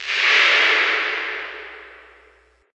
This is another reverb IR for convolution reverb plug ins like Space Designer and IR1 made using UAD plug ins, other IR verbs and Logic.
convolution, uad